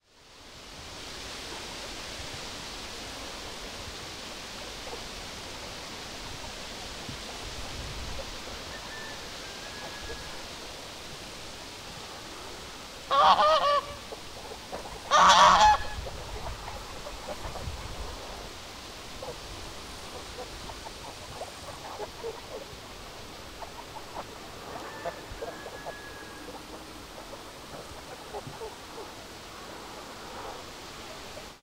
goose in the pond
Place: Bereske, Tatarstan, Russan Federaton.
Date: August 2012.
village, field-recording, nature